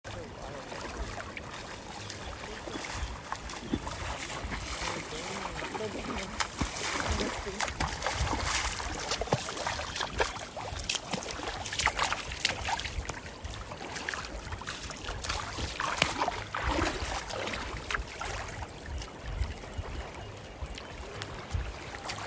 Walking on the shore, splashing
Feet splash in the water as they walk on the shore.